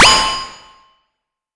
New Skill 02
New skill!
This sound can for example be used in animes, games - you name it!
If you enjoyed the sound, please STAR, COMMENT, SPREAD THE WORD!🗣 It really helps!
cartoon, collect, level-up, skill, game, pickup, level, adventure, new, collectable, anime